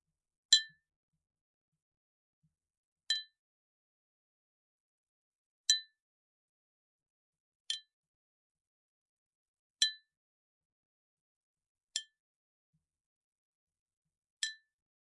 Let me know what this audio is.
hitting a glass bottle
bottle, blow, Glass